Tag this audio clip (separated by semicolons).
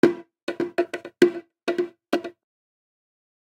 congatronics; bongo; loops; samples; tribal; Unorthodox